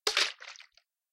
Object in the water
The sound of an object falling into water
water, object, fall